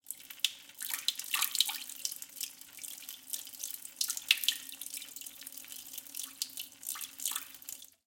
Sound of urination - Number 3
Pansk, Panska, toilet